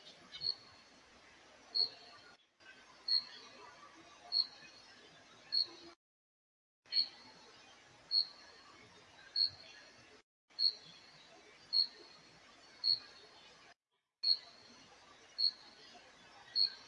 night cricket sound
Sound of cricket at night in the grass
cricket, sound, night, grass